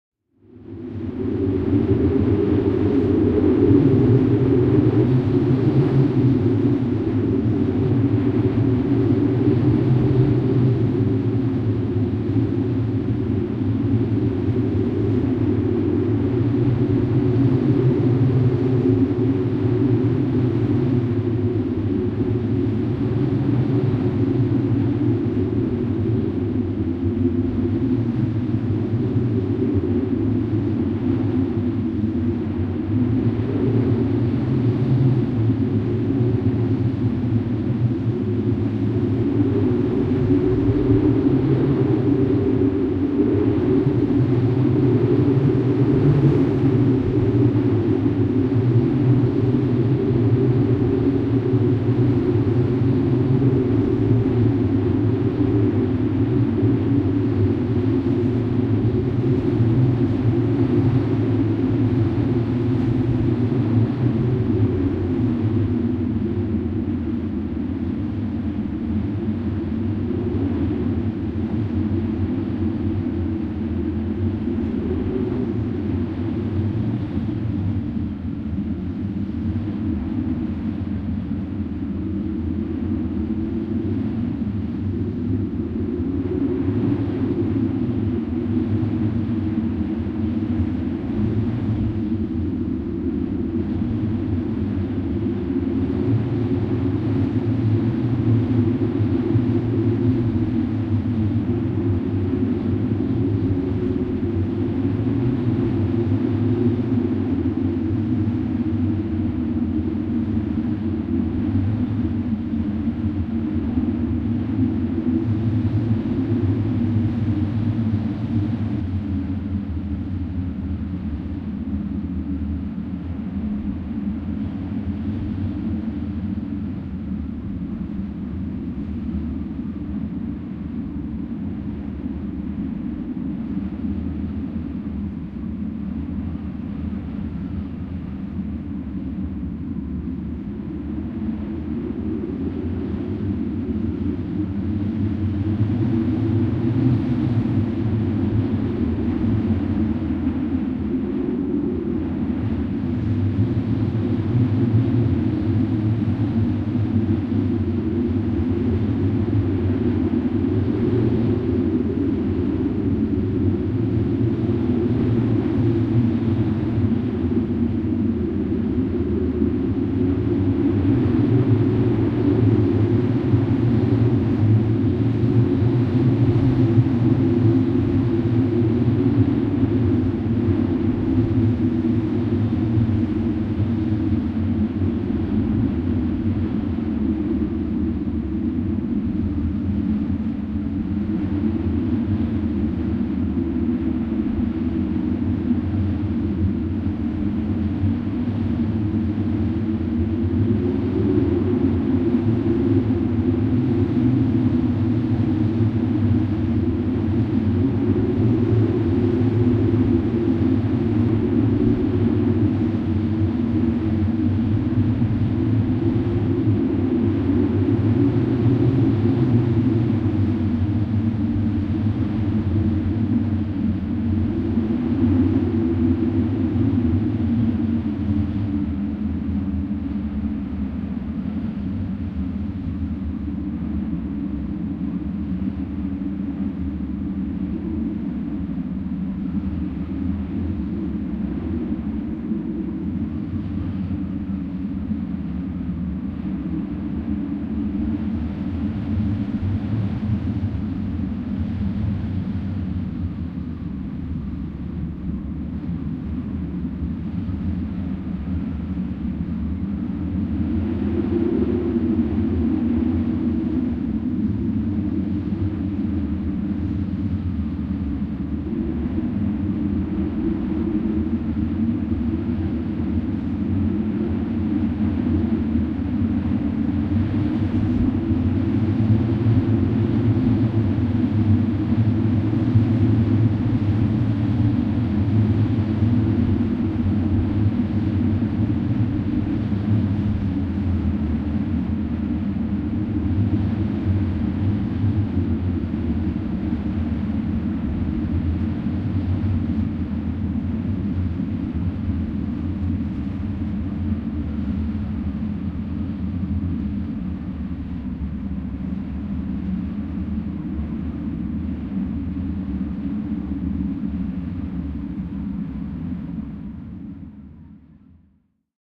Sähkölinja, sähkölangat soivat, humisevat tuulessa.
Paikka/Place: Kanada / Canada / Baker Lake
Aika/Date: 13.06.1986